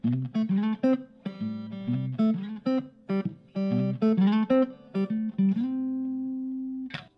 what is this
electric guitar played through a LANEY amp and recorded with an H5 Zoom recorder
apstract, electric, groovie, guitar, jazz, jazzy, solo